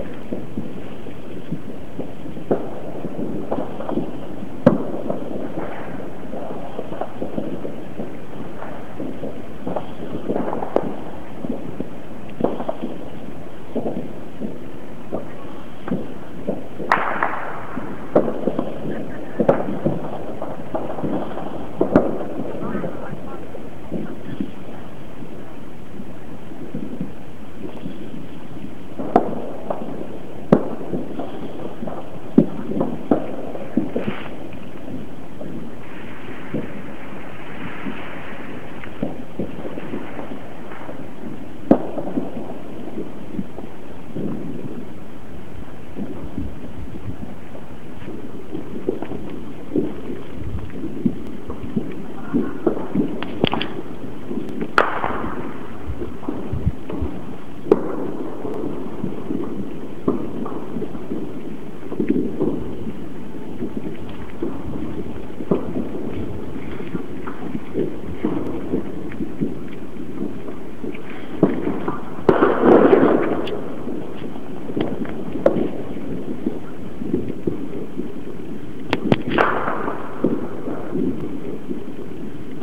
this is a recording i have done new years day.
01.01.2010,02:52 o clock in the morning.
best wishes!
ambient, shot, explosion, fireworks, sylvester, field-recording, newyearsday, live, berlin, ambience